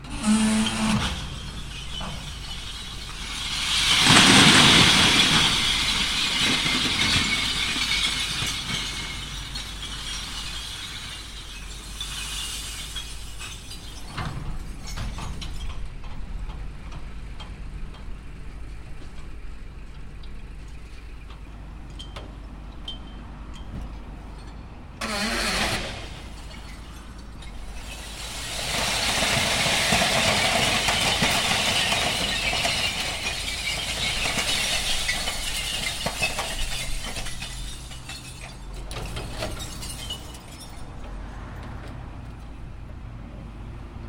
A garbage truck is clearing some containers of glass and dumping it on a lorry.